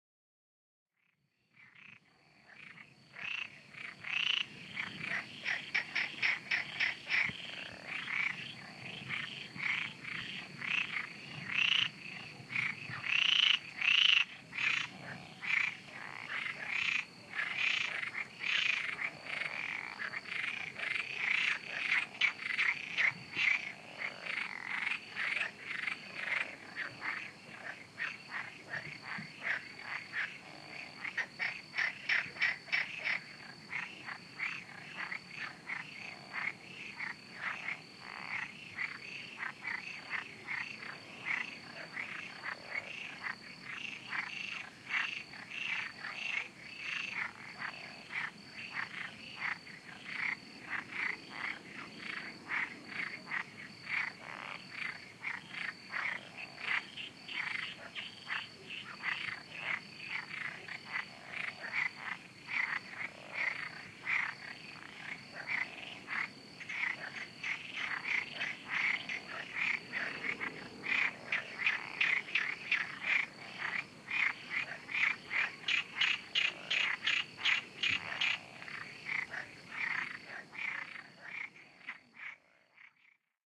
Night Ambience Country
Frogs, insects and crickets from a close wet crop.
Ambience, Environment, Outdoors, Frogs, Atmosphere